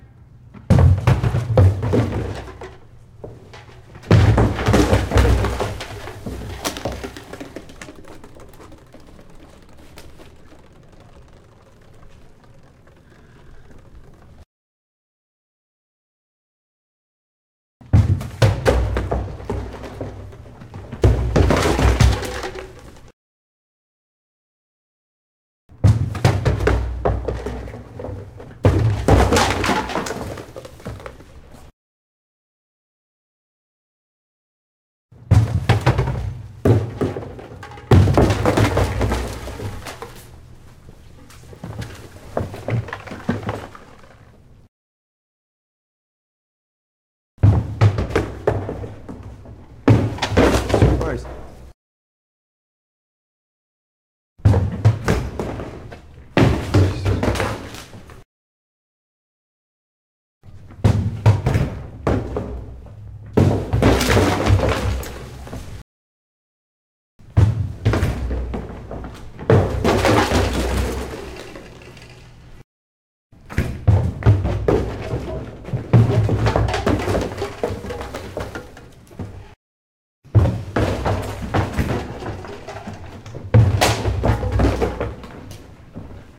trash can plastic bin kick over angrily and bounce on pavement gritty garbage fall out